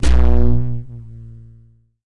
Some Djembe samples distorted